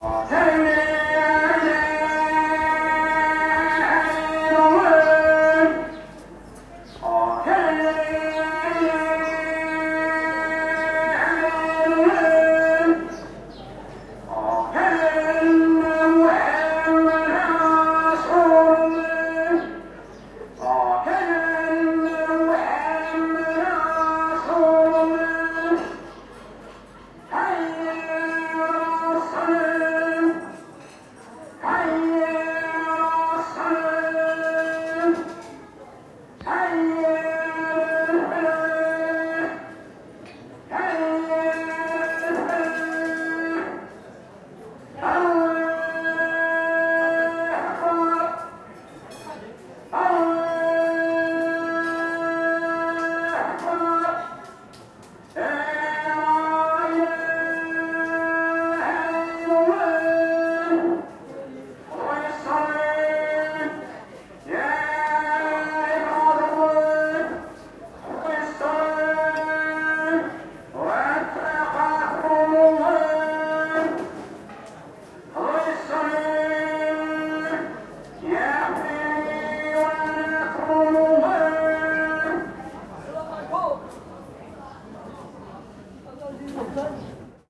Street Ambience muezzin
Recorded in Agadir (Morocco) with a Zoom H1.
Agadir, ambience, Arabic, Morocco, muezzin, prayer, street